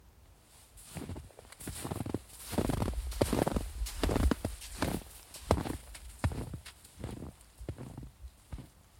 footsteps in snow 3
footsteps, steps, winter